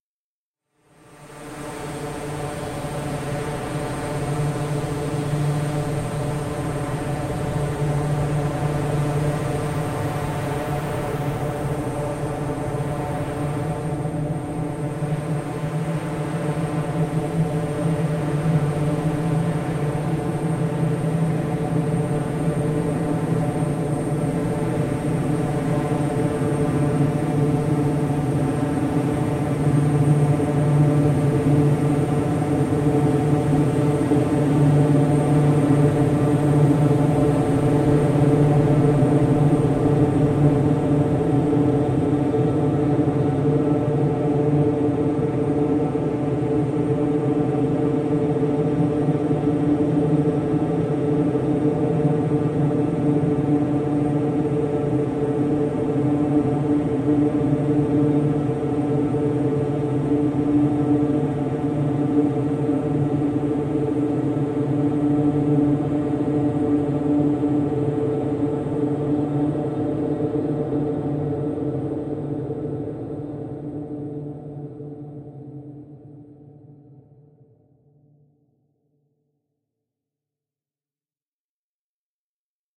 LAYERS 003 - Helicopter View - D3
LAYERS 003 - Helicopter View is an extensive multisample package containing 73 samples covering C0 till C6. The key name is included in the sample name. The sound of Helicopter View is all in the name: an alien outer space helicopter flying over soundscape spreading granular particles all over the place. It was created using Kontakt 3 within Cubase and a lot of convolution.
artificial, drone, helicopter, multisample, pad, soundscape, space